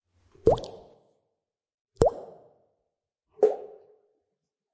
soft plop
self-made with my mouth
bloop, blopp, drop, plop, popping, soft, waterbubble